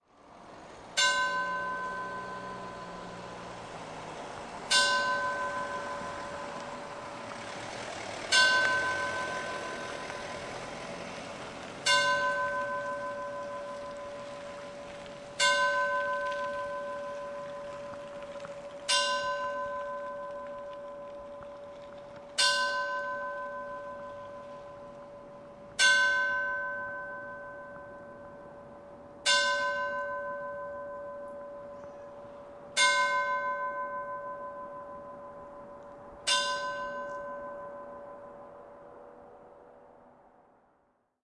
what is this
church bells 2
Church bell ringing at eleven o clock
bells, catholic, church, CZ, Czech, Panska